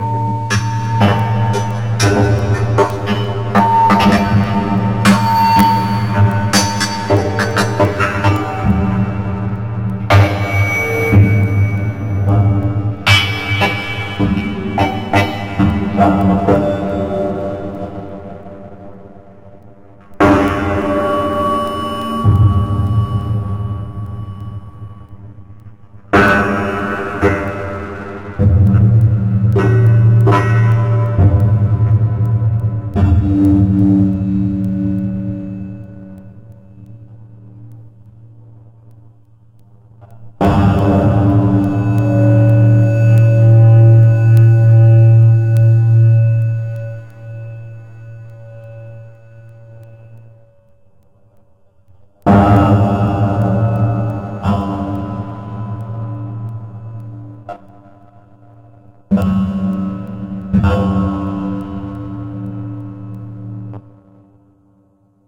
A metallic percussive sound of my house. I added reverb and some effects with audacity.